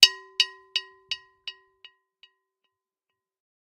A wooden ball on a string swinging against a clay vase. Kind of a natural delay.
against, ball, clay, delay, effect, hit, natural, percussion, vase, wooden